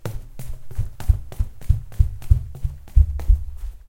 running on a house passage
footsteps, steps, foot, footstep, hurry, running, run